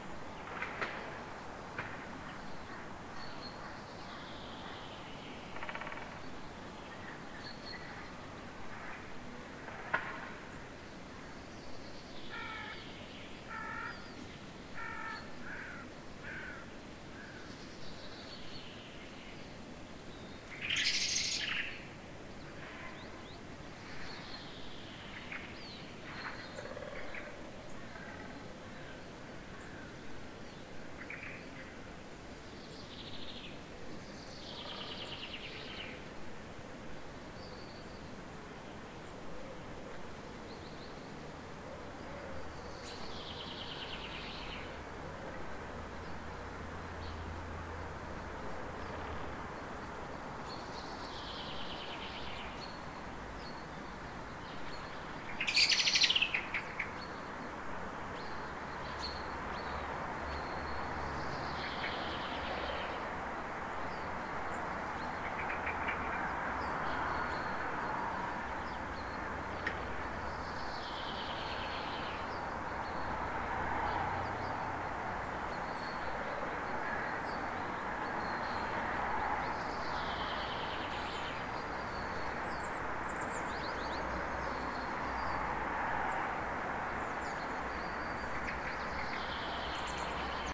garden03 05 (Surround R)
Recorded with Zoom H2 at 7:30 am. Near street-noice with several birds
graz, morning, 6channel, birds, garden